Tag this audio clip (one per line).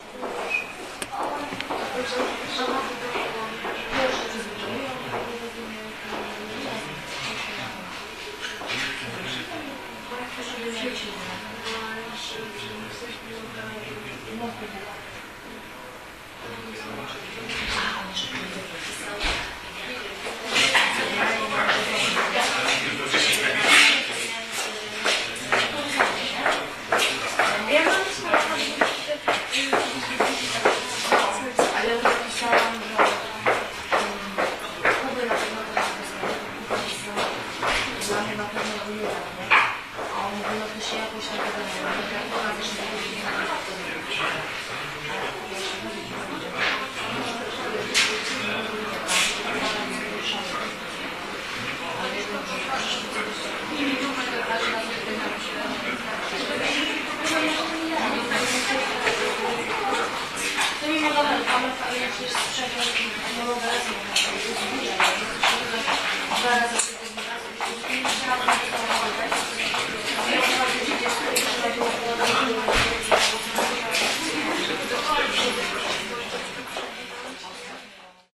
people
field-recording
corridor
poland
hig-heels
steps
voices
court
poznan